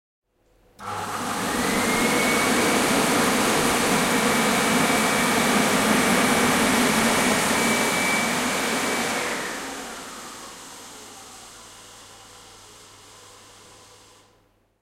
A hand dryer in a restroom, somewhere in GB. Microphone is moving while recording making a chorusing effect.